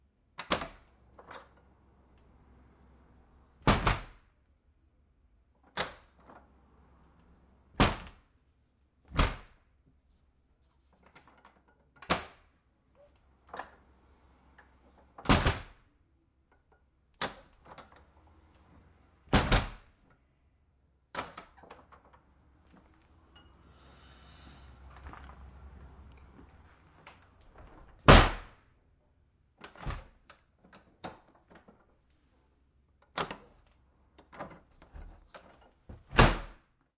door wood apartment old door with latch open close uneven push rattle
old, wood, rattle, open, close, push, latch, apartment, door